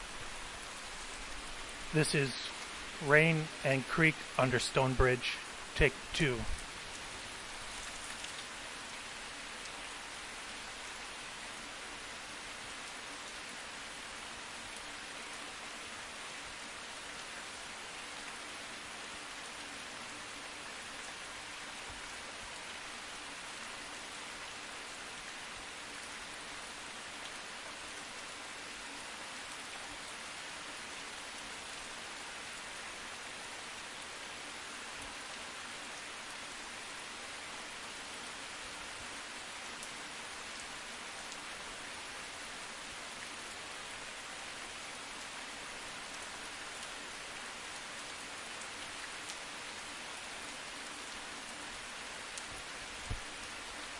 FIELD LA Rain Park Under stone bridge 02
Rain recorded in Los Angeles, Spring 2019.
Standing under a stone bridge in the rain.